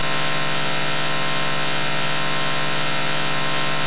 Doom Bug 2
Harmonically-rich buzzing sound.
glitch, noisy